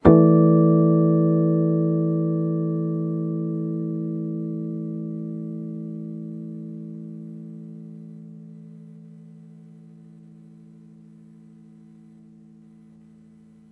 Finger plugged.
Gear used:
Washburn WR-150 Scalloped EMG-89 Bridge